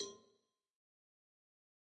Cowbell of God Tube Lower 003
home, record, god, metalic, trash, cowbell